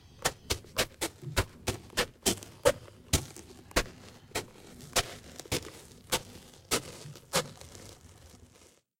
Walking on small gravel stones
foley, Gravel, slow, small